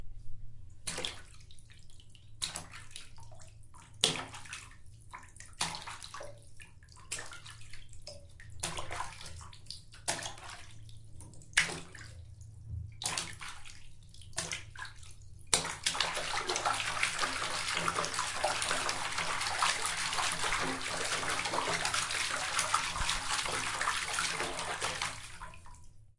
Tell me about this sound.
Splashing Water
Point, University, Park, Field-Recording, Koontz, Elaine